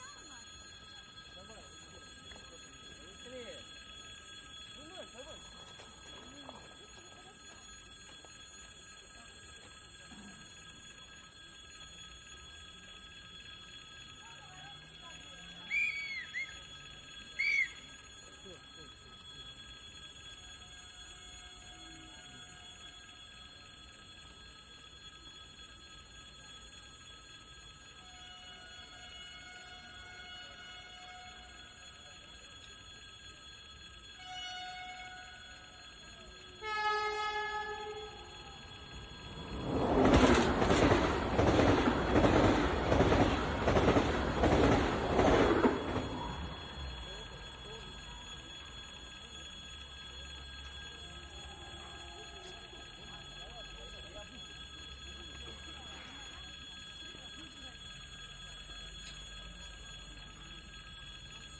A fast Train is passing by at Udelnaya Station, St.Petersburg, Russia.